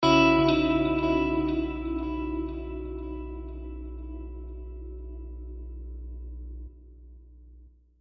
a C#min9 11 chord with delays and verb